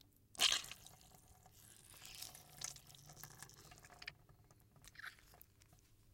Long Splash and squishy sound
Dirty sounding squishing sound made with an orange being pressed very close to the mic. Can be used for anything from blood splatters or brain exploding, or just a tomato being cut into pieces. This time a longer version.
slush, effect, blood, splatter, splash, Long, squishy, sound, dirty, splat, gross, squish